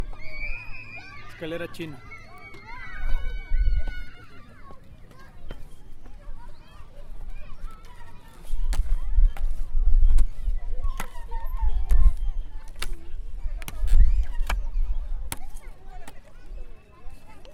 Sonido de una personas cruzando un pasa manos